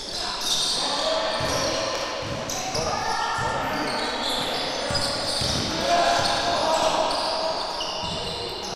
Fricción parquet pista basket